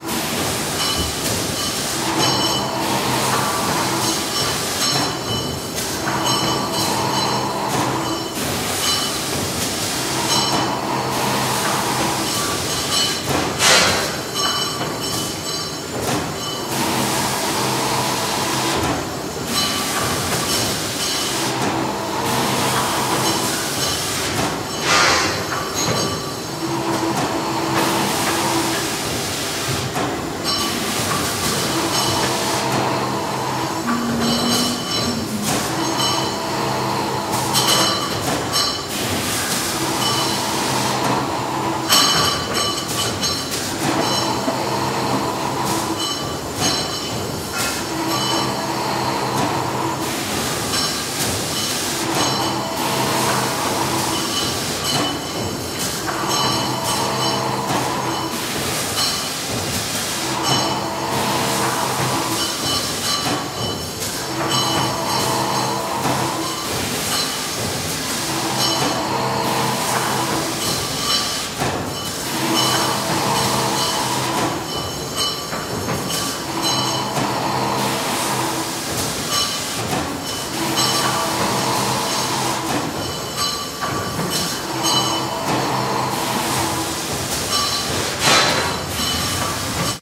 Mono industrial ambience.
I captured it during a shoot in a factory district.
Sennheiser MKH 416, an inline HPF was engaged hence the lack of low end.